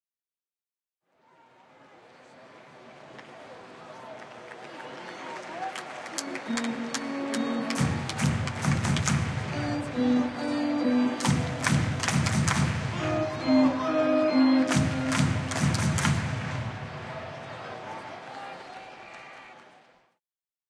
WALLA Ballpark Let's Go Rangers Organ
This was recorded at the Rangers Ballpark in Arlington on the ZOOM H2. Crowd chanting, "Let's Go Rangers!" to the organ.